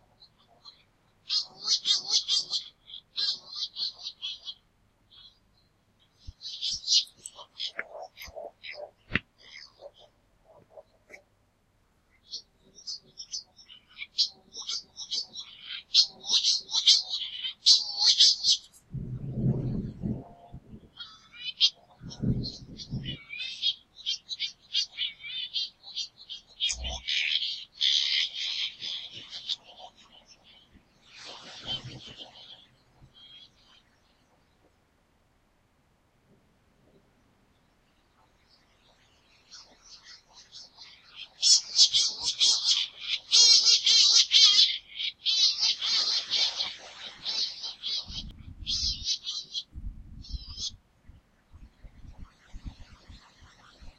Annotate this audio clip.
Great Shearwater (Puffinus gravis) seabird calls
The Great Shearwater (Puffinus gravis) is a seabird typical of the island of La Gomera, Canary Islands, Spain. This recording of their signature "owa-owa" call was recorded in Playa Santiago on La Gomera in March 2017 with a ZOOM H2 recorder.